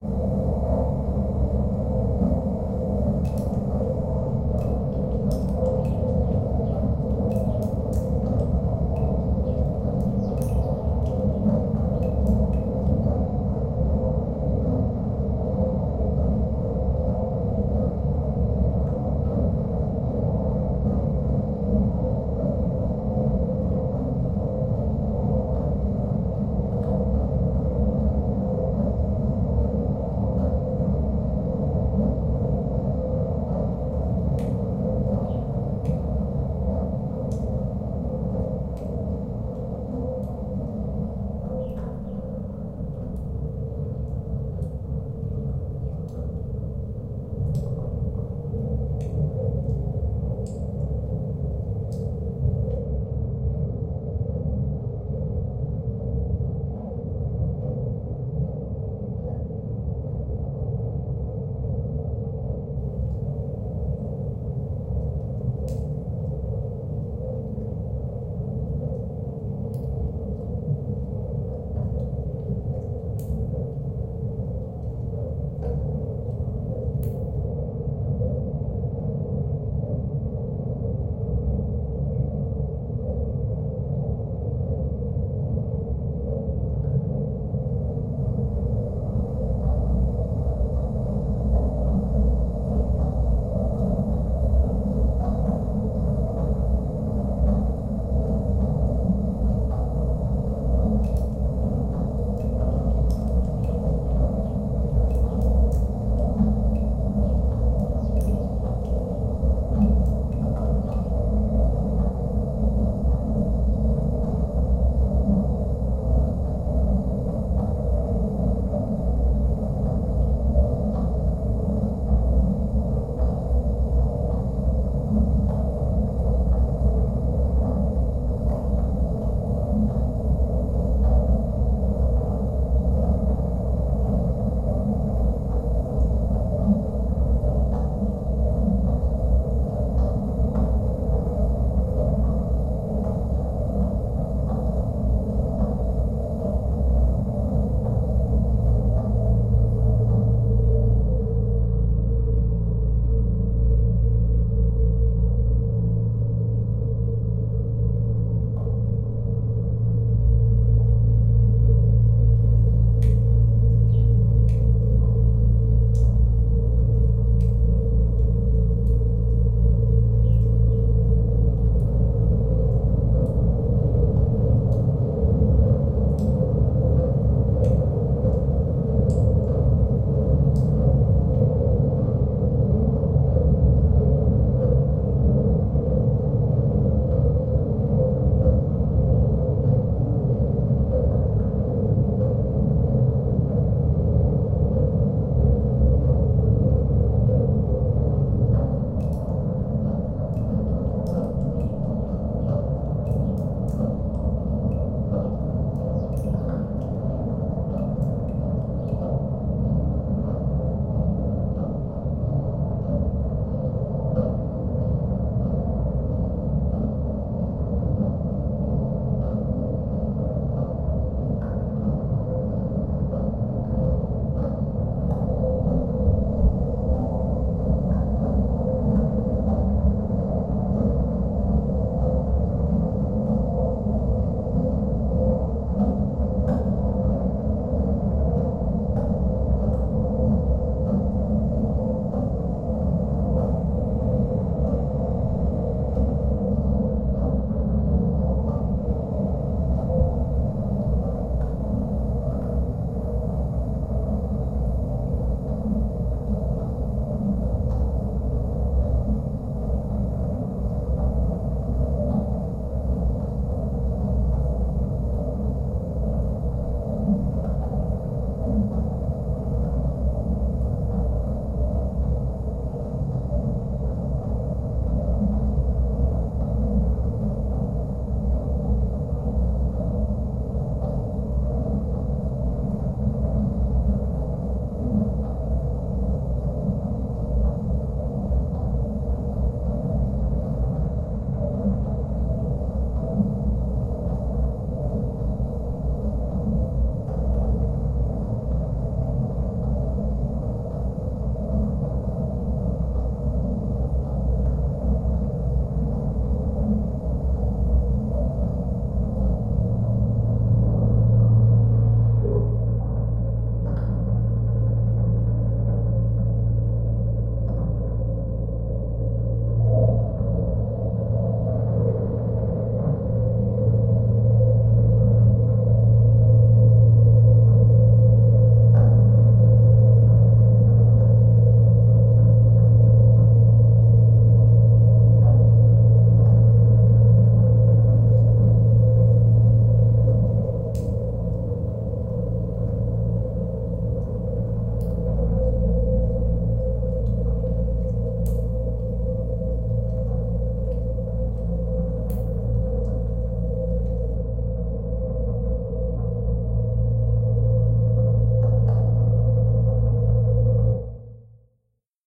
ambient noise
sci-fi/cave background noise I created for a game I worked on. The mechanical/windy sounds are an edited recording of my washing machine.
scifi, drips, mechanical, syfy